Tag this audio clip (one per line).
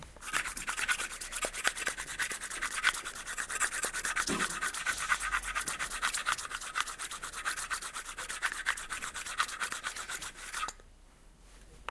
Brussels
Sint